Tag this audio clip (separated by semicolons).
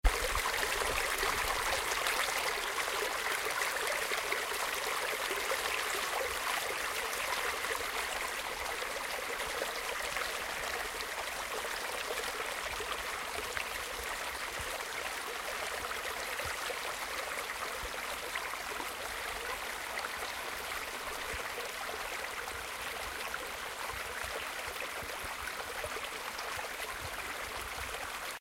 creek; gurgle; liquid; river; stream; trickle; water